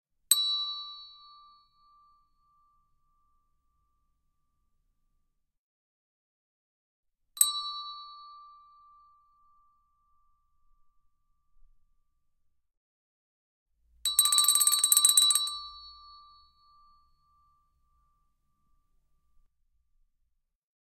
chromatic handbells 12 tones d1
Chromatic handbells 12 tones. D tone.
Normalized to -3dB.
English-handbells, bell, chromatic, double, handbell, percussion, ring, single, stereo, tuned